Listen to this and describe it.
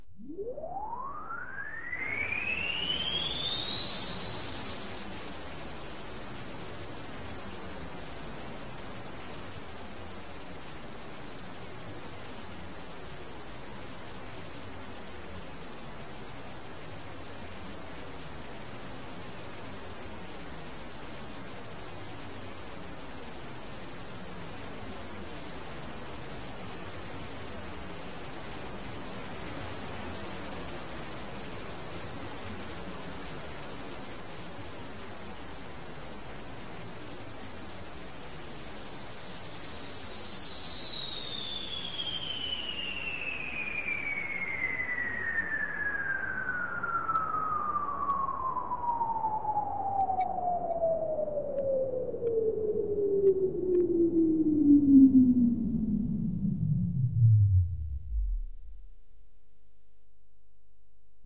time-space-transfer3a
Falling in air, space time tranfer sound. imaginary sound done with clavia nordlead 2 and recorded with fostex vf16
falling, imaginary, scifi, space, synthedit, synthetic